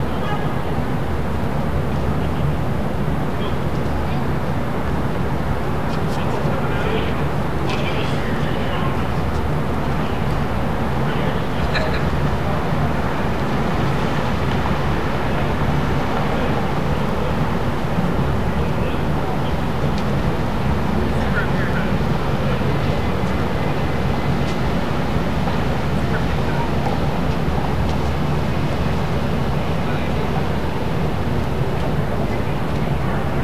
Jun Kaneko Dango 02 Android
Urban ambient captured as an attempted contact recording of one of Jun Kaneko’s ceramic Dango sculptures (2 of 4) in San Jose, CA, USA . Sampled on February 12, 2011 using a Sony Ericsson Xperia X10 wired piezo microphone, adhered with putty.
ceramic, contact, contact-mic, contact-microphone, field-recording, improvised-mic, urban, wikiGong